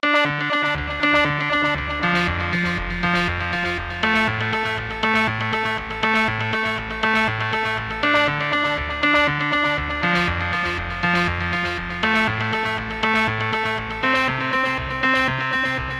Synth Air Seq (120 BPM)
Synth Air Seq. Key: Dm, 120 BPM.
Melodic, Seq, Loop